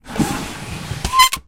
Squeaky cupboard door
Cupboard drawer closing with a squeak. Recorded onto HI-MD with an AT822 mic.
drawer-closing, furniture, grate, squeak, household